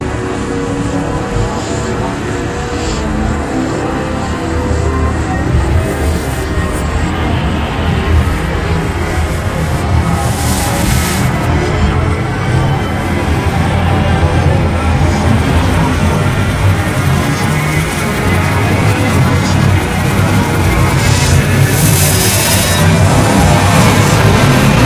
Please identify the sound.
world end02
bad, drama, ending, fear, frightful, horror, horror-effects, horror-fx, movie, terror, thrill, video